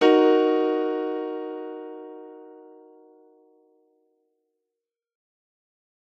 D Sharp Minor piano chord recorded with a Yamaha YPG-235.